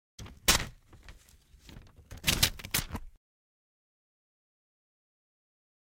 Paper torn down the middle. Recorded with a shotgun mic at a close distance.